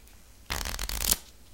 record, Quick browsing
book, browsing